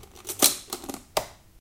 sugar close
Closing the top of a latched container
sugar, kitchen, latch, close